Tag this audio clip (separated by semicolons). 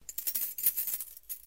rustling; door; jingling; key; keys